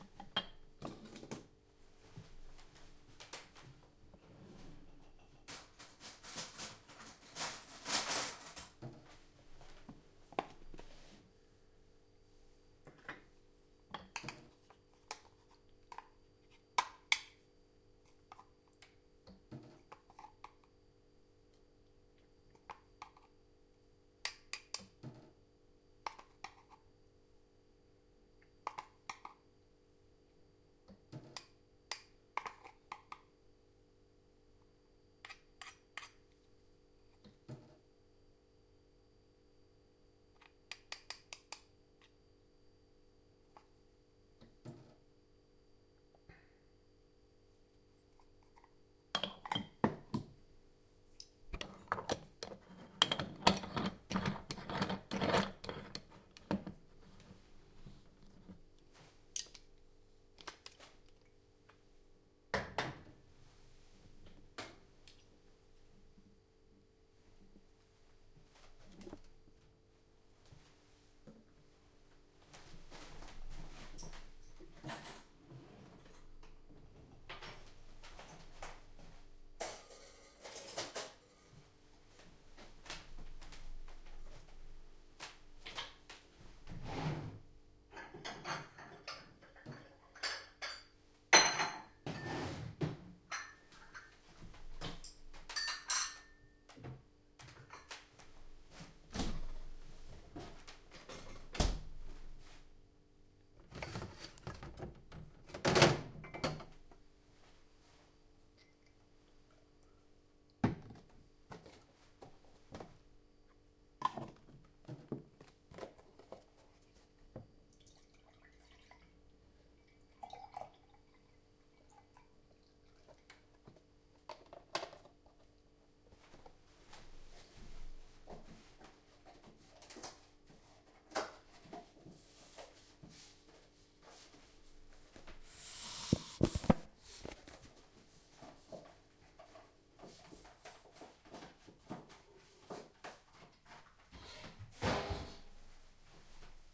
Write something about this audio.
STE-010 cooking espresso
preparing a brikka espresso cooker and putting it on an electric stove. moving around in the kitchen, taking a porcelain cup from the board, putting it on the toaster for pre-heating. recorded with zoom h2. no postproduction.
coffee, cooker, water, kitchen, dripping, preparation